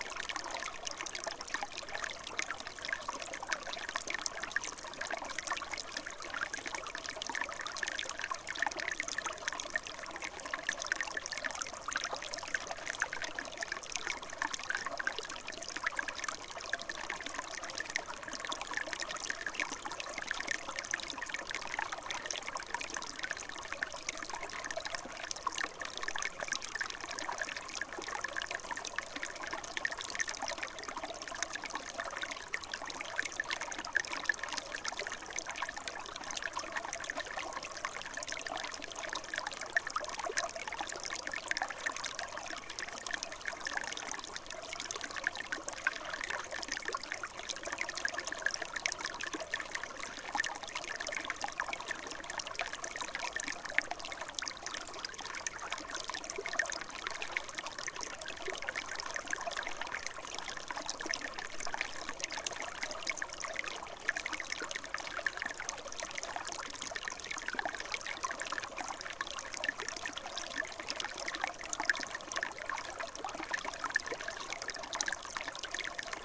Babbling brook, extreme closeup 3
Babbling brook, extreme closeup. This sample has been edited to reduce or eliminate all other sounds than what the sample name suggests.